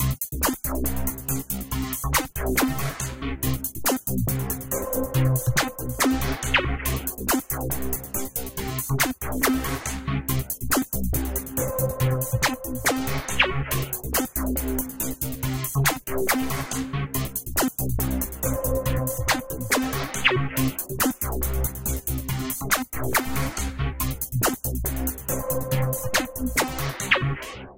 Techno-DrumLoop
If you're working on their new a hit game in the style of action - this sound to you! For gaming!